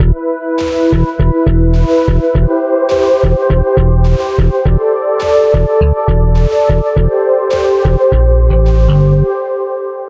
Groove Loop #1
A groove loop made in FL Studio. Sound inspired by Faith No More's song "We Care a Lot".
80s, alternative, bass, beat, drums, faith-no-more, funk, funky, groove, groovy, grunge, improvised, keys, loop, metal, mistery, music, rock, song, version, we-care-a-lot